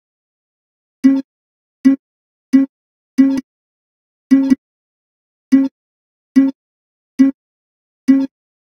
Some plucks with old zither instrument recorded at home, retuned in Ableton.
home-recording, rodentg3, Zither